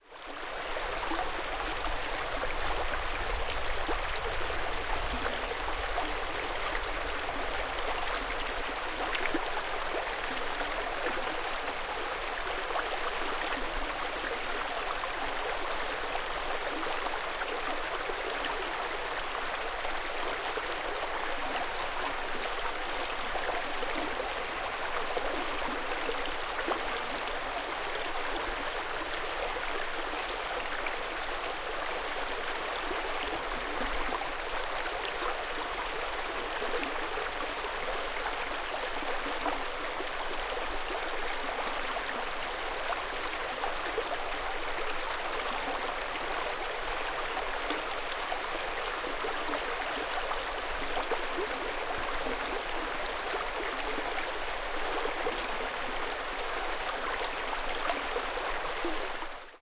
babbling-brook a-minute-of-VT-Zen
Recorded Mar 13 2016 in the woods in Manchester, VT. Zoom H4n in 4CH mode using built-in stereo mics and a Sennheiser K6/ME66 shotgun. This small but quick moving stream had recently blocked a small dirt road.
babbling, brook, stream, water